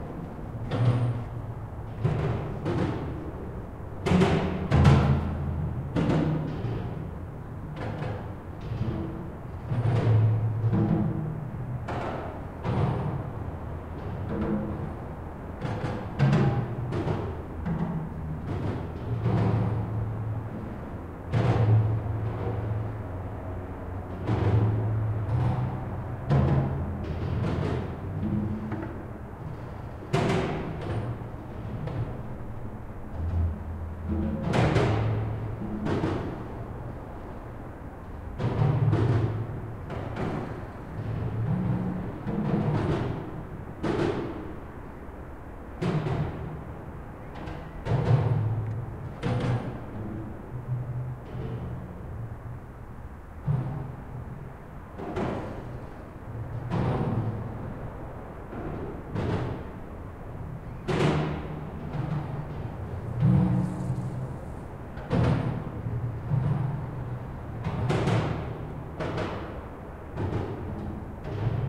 under Leningradskiy bridge4
The roar of a bridge, when the cars drive over the bridge. Left river-side.
Recorded 2012-09-29 04:15 pm.
2012, Omsk, Russia, atmo, atmosphere, bridge, cars, noise, roar, rumble